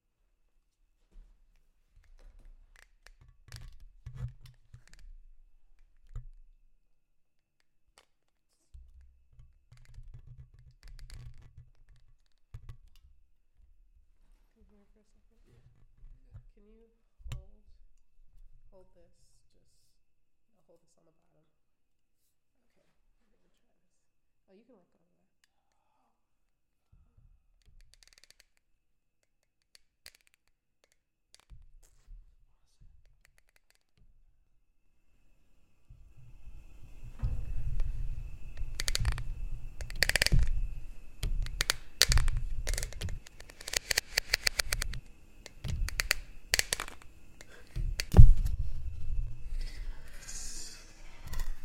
sliding to objects together and editing the sound outcome